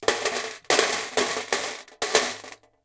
Rhythmic sounds of glass mancala pieces in their metal container.